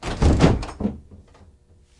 Door Open 2
Wooden Door Open Opening
opening, wooden, open, door